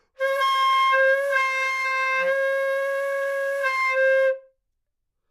Flute - C5 - bad-richness
Part of the Good-sounds dataset of monophonic instrumental sounds.
instrument::flute
note::C
octave::5
midi note::60
good-sounds-id::3175
Intentionally played as an example of bad-richness
C5, flute, good-sounds, multisample, neumann-U87, single-note